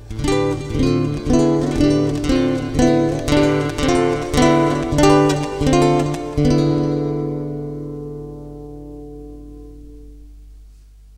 7th chords played on a nylon string guitar, faster
chord, guitar, intro, nylon, seventh